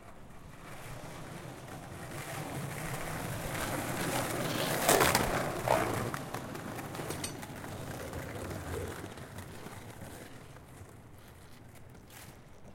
The sound pf skate boards that i take for my video project "Scate Girls".
And I never use it. So may be it was made for you guys ))
This is All girls at one sound